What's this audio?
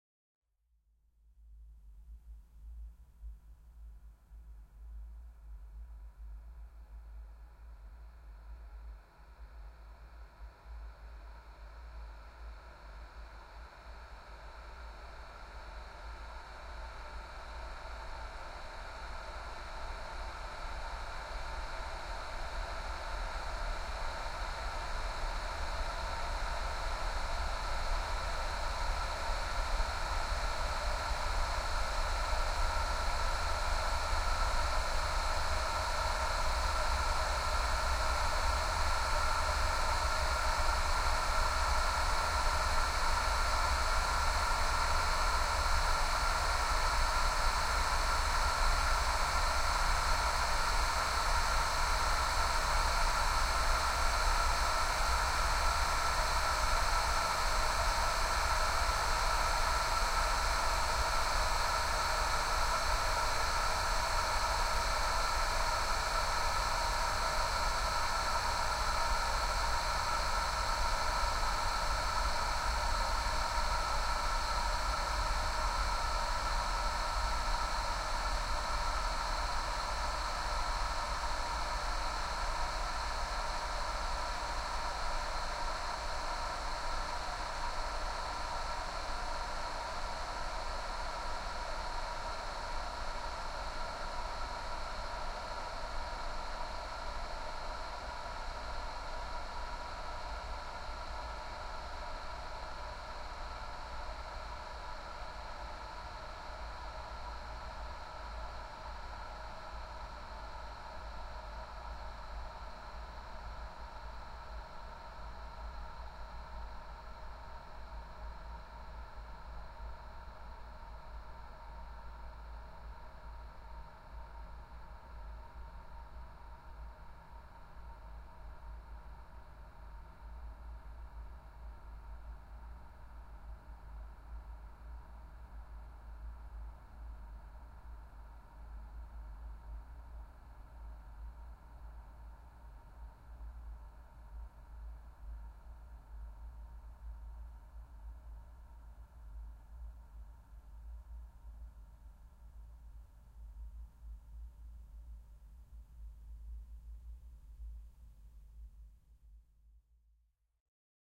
breathing time-stretched
single female exhale time-stretched to the extreme.